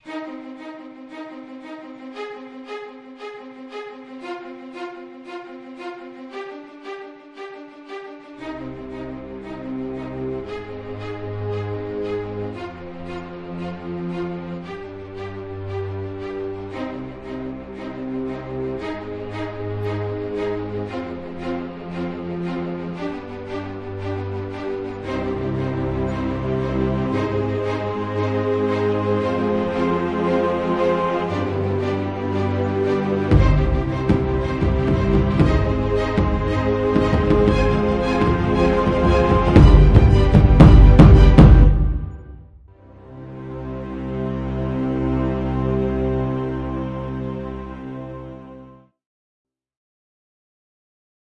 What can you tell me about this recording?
A 50 second fragment of epic music created by me. It can be used for a soundtrack.
soundtrack, trailer, adventure